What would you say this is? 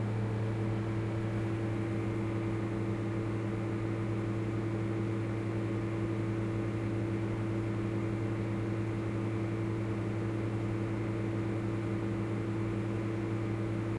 Some random machine build into a wall
Machine, noise, engine